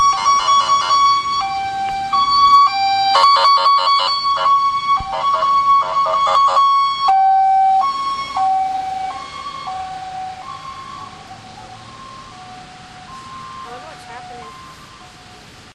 washington siren
A British sounding police car passes by the John Ericson National Monument (never heard of him either) recorded with DS-40 and edited in Wavosaur.
field-recording, summer, travel, vacation, washington-dc